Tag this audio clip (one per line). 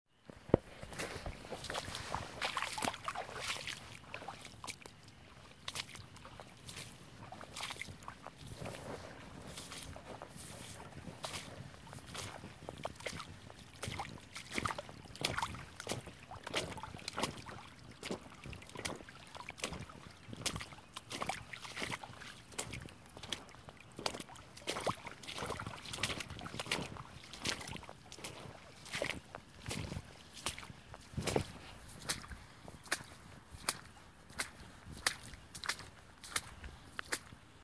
boots
field-recording
flooding
footstep
footsteps
liquid
rain-boots
step
steps
stomping
wading
walk
walking
water